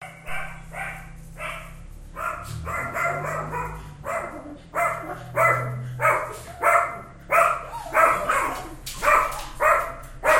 my dogs barking happy as i return home
barking, dog, happy